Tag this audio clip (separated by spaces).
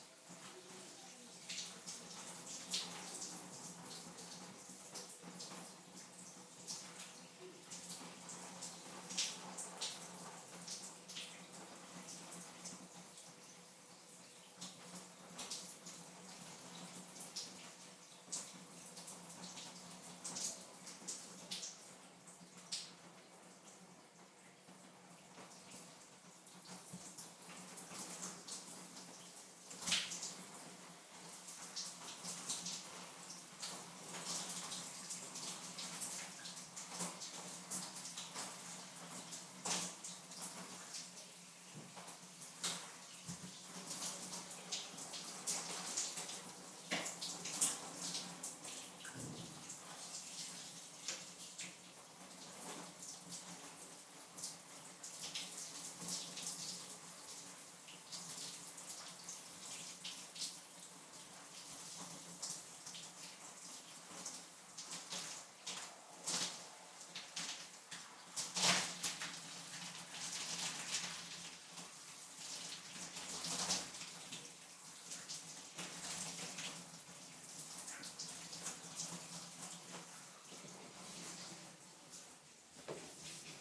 wet drops shower